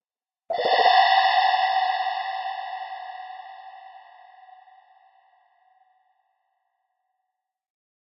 etl Cat Explodes 24-96
One drop of water, processed, sounds explosive and slightly sci-fi,
explosion, water, processed, fi, drop, sci-fi, sci